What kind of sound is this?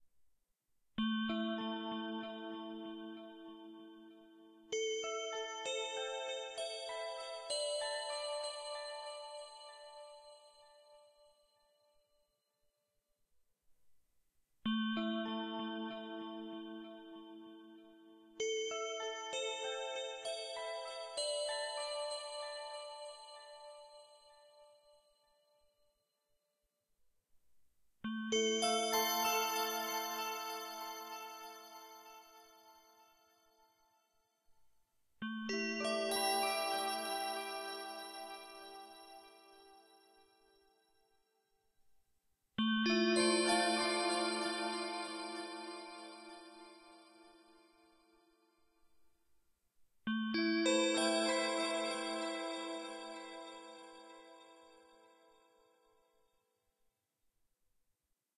Calm Emtim Bell music
Calm looping Emtim Bell music made for multiple purposes created by using a synthesizer and recorded with Magix studio. Edited with audacity.
ambience, ambient, bell, calm, emtim, loop, music, peaceful, relaxed, serene